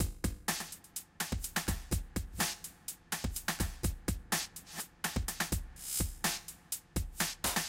oldschool-glitchy
Glitchy old-school beat